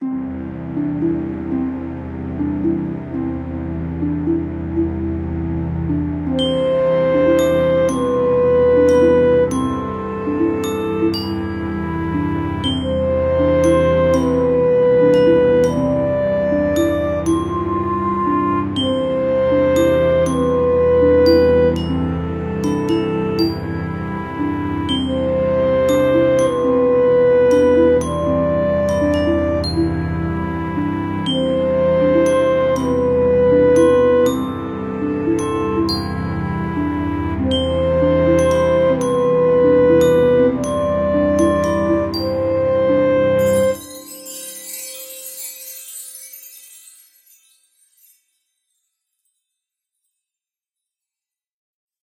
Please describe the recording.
3.15.16 - Peaceful Melody
A short composition that can be used as a peaceful fairy tale melody.
clarinet, fairytale, garageband, harp, instrumental, melody, music, peaceful, strings